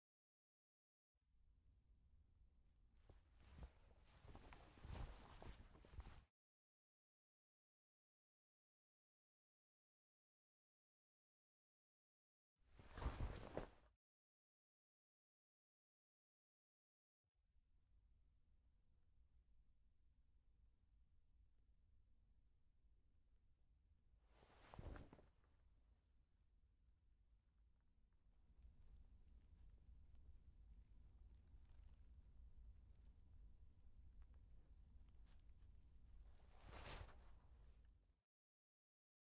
Mandy Jacket Cloth pass 2
The sound of a jacket shifting as the character moves
cloth, Foley, jacket, pass